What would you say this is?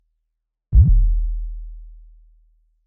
HK sawn A0
A, bass, buzzy, drum, kick, oneshot, percussion, saw, saw-wave